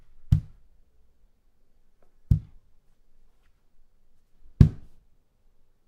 heel down on floor hit thud
down, floor, heel, hit, thud